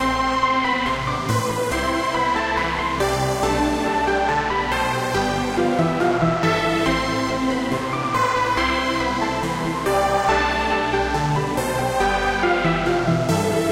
Short intro loop i made for my new song.
140, bmp, intro, loop, techno, trance